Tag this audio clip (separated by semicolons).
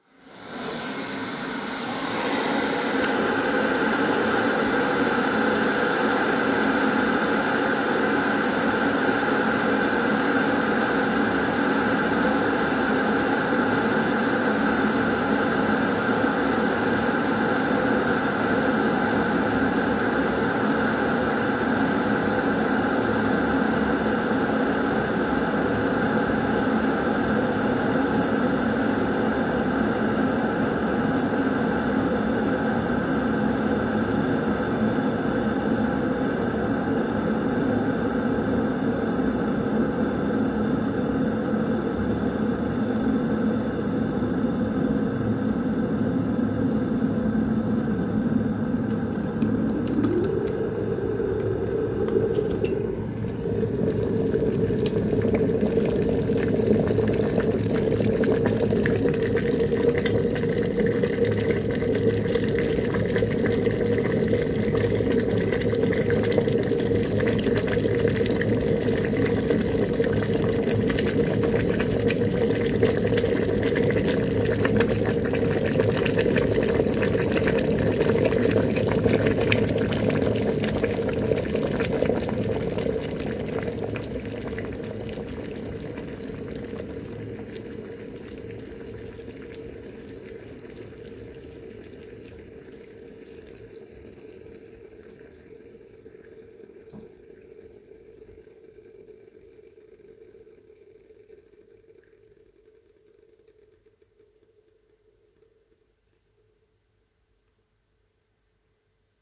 boiler; kettle; hot; boil; boiling; water; tea